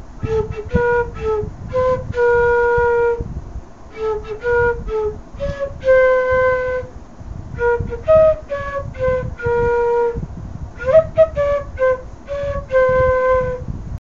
Played on indian bamboo flute (transverse)
jingle, bamboo-flute, flute, birthday, bday